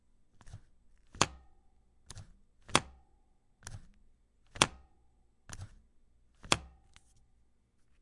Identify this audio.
1 - Bici (Maneta)
Proyecto SIAS-UAN, trabajo relacionado a la bicicleta como objeto sonoro. Registros realizados por: Julio Avellaneda en abril 2020
paisaje-sonoro, Proyecto-SIAS, Bicicleta-sonora, bicycle-sounds, objeto-sonoro